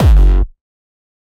kick hardcore
made by mixing synthesized sounds and self-recorded samples, compressed and EQ'd.